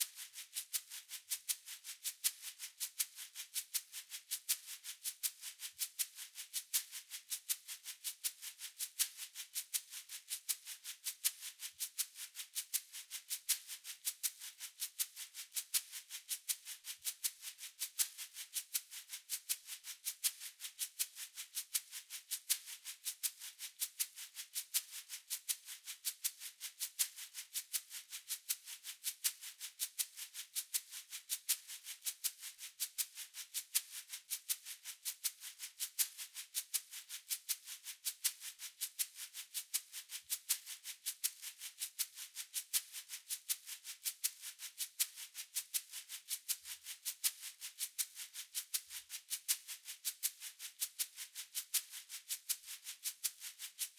Song7 SHAKER Do 3:4 80bpms
80, beat, blues, bpm, Chord, Do, HearHear, loop, rythm, Shaker